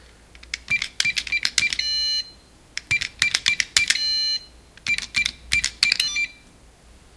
KEYPAD FAIL/OK
Attempts to enter the correct code into a door security keypad in a public building.
KEYPAD, DOOR, ERROR, SECURITY, CODE